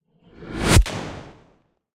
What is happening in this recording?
whoosh into hit 002
Designed whoosh into impact